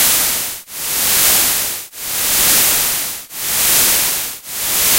Independent channel stereo white noise created with Cool Edit 96. Envelope effect applied to make a nice neat graphic.